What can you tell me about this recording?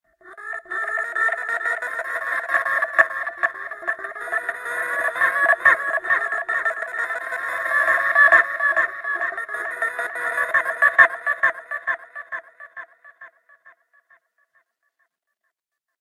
Skidding Scream 90bpm
My brother shouting into a tin can
gated, loop, Scream